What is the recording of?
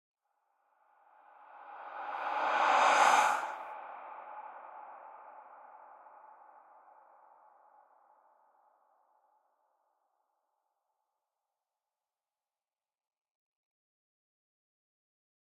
Otherworldly sound of male breathing. Close and walk-through stereo panorama. My voice was recorded, then processed with noise reduction. The processing includes equalization(remove voice resonances) and impulse reverb (including reverse) with filtering. Enjoy it. If it does not bother you, share links to your work where this sound was used.
Note: audio quality is always better when downloaded.